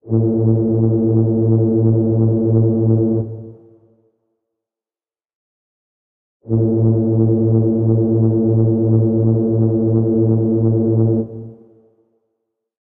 This is the sound of a ship horn blast. Perfect for scenes with boats / ships!
Ship, Urban, river, Blast, Horn, Sea, Traffic, bridge, boat